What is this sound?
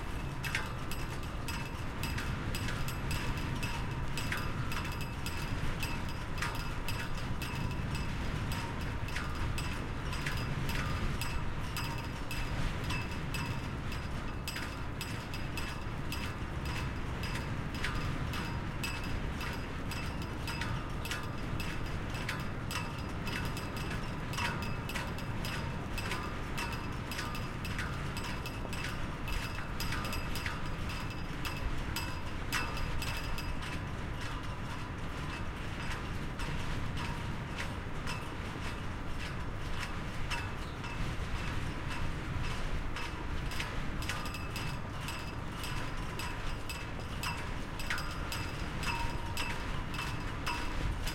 Ambience EXT day flagpoles in wind close
Ambience, flagpoles, EXT, day, wind